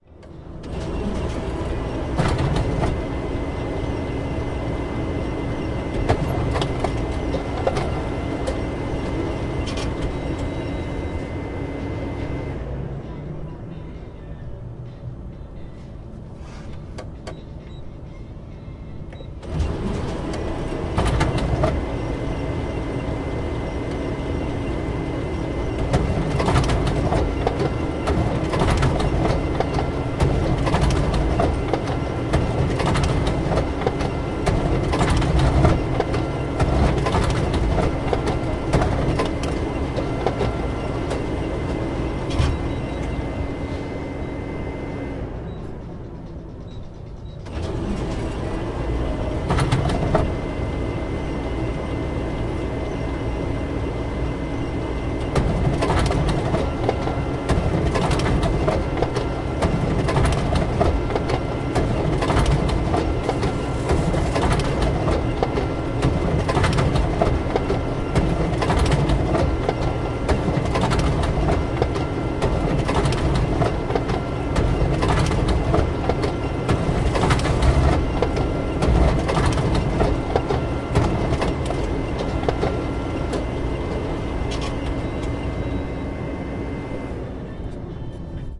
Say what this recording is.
Copy Machine 1
A recording of a Canon 5070 copy machine in operating and being programmed. Recorded using a Zoom H4. During the recording, heavyweight cardstock (A7) was being printing on, being fed from the machine's side bypass.